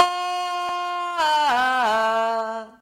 pum aaaa
voice samples for free use